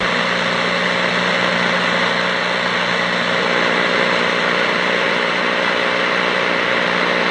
am static 1
Static with buzzing sound on AM radio band. Recorded from an old Sony FM/MW/LW/SW radio reciever into a 4th-gen iPod touch around Feb 2015.